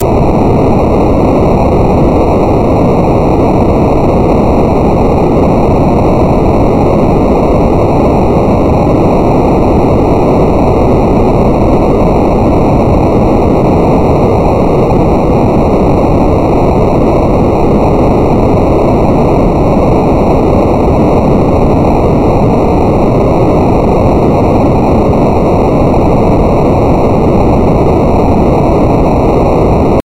14 LFNoise1 1600Hz
This kind of noise generates linearly interpolated random values at a certain frequency. In this example the frequency is 1600Hz.The algorithm for this noise was created two years ago by myself in C++, as an imitation of noise generators in SuperCollider 2.